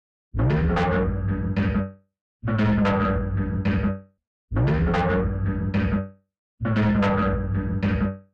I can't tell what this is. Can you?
Pitching industrial bass loop (115bpm)
Loop made by putting a resonator with pitch automation and lots of other processing on the chopped up sound of dropping a pencil in a grand piano